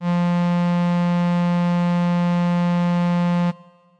FM Strings F3
An analog-esque strings ensemble sound. This is the note F of octave 3. (Created with AudioSauna, as always.)
pad, strings, synth